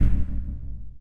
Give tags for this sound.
stab; percussion; electronic

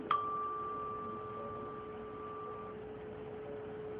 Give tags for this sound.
hit; percussion; metal; one-shot

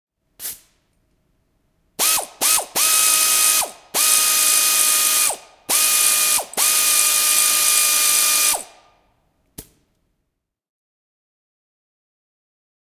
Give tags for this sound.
ratchet; construction; shop

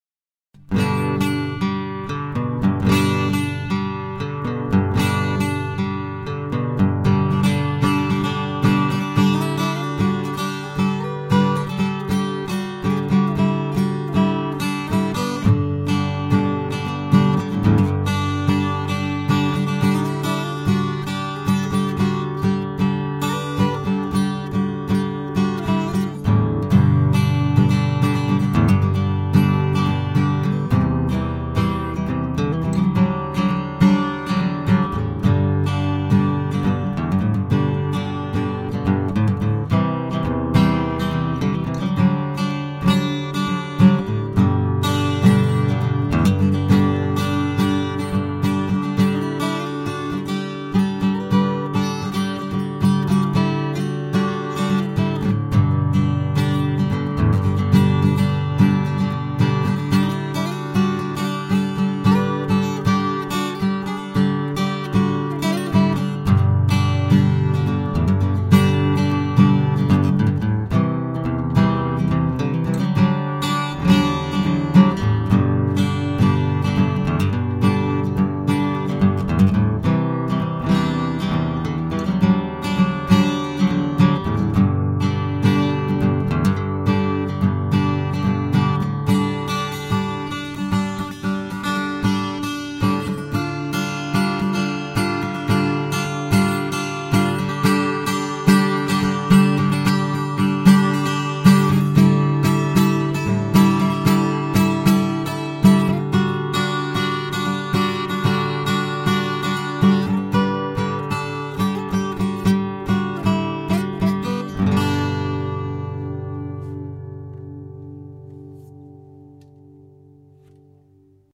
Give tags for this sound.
acoustic; acoustic-guitar; cleaner; experimental; guitar; instruments; melodical; music; psychedelic; rhythmic; sketch; sound

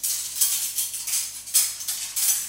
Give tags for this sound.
cutlery; handling

